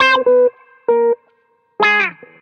GTCC WH 10
fm, wah, guitar, samples, bpm100